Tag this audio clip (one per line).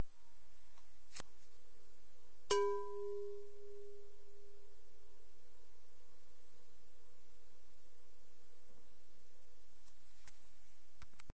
nail
striking
bowl
glass